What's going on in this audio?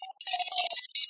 This originally was a recording done at a school, where a child was scraping the ground with a rake. It was extremely noisy because of the air conditioner nearby...this is the result of some strange noise reduction.